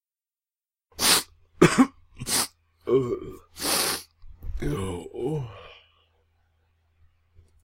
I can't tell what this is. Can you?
Man with a cold. Applied Pitch Bend and Noise Reduction. Recorded at home on Conexant Smart Audio with AT2020 mic, processed on Audacity.
Sniffles, flu, nose, sneeze, sick